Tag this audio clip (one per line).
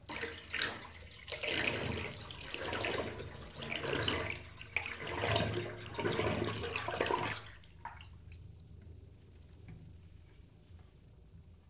bath
bathroom
bathtub
emptying
water